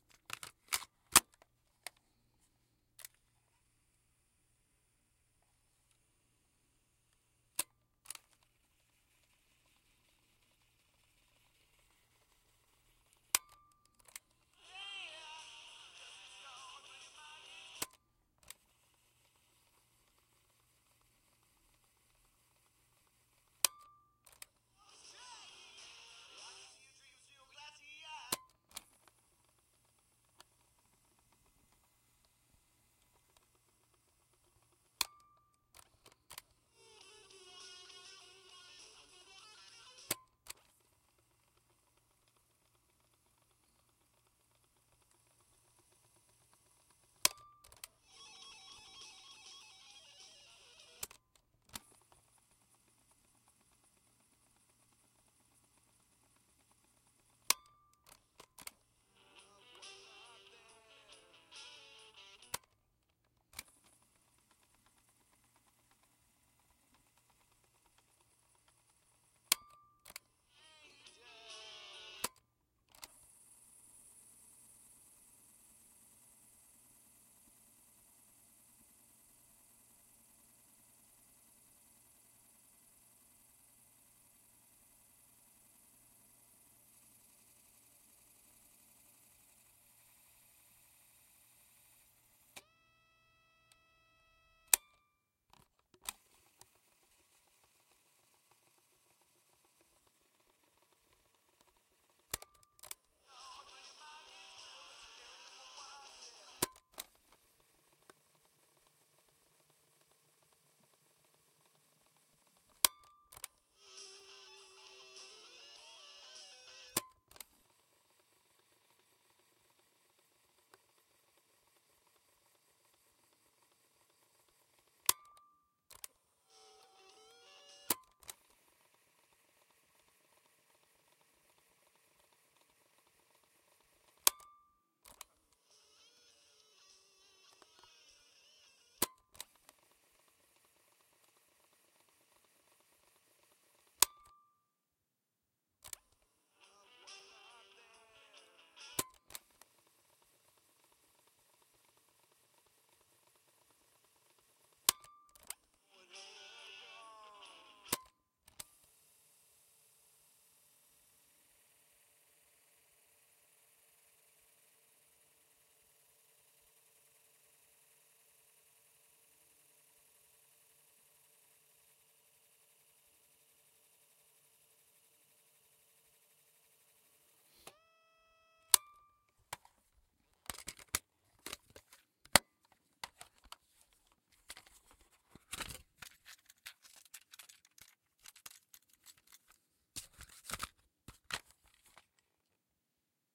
CassettePlayer-RWD-FWD-STOP
The file ends with removing the cassette from the player and placing it back in the case.
cassette, fast, forward, play, player, rewind